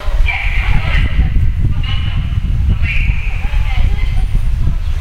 An unintelligible subway station announcement heard from a distance, some wind also obscures the sound.